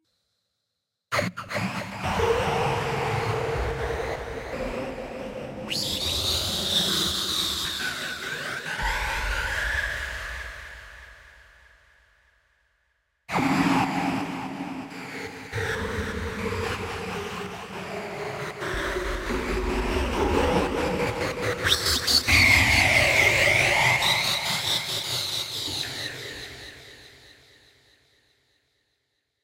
spooky whispers
A whispering type sound on an analogue modeling synth.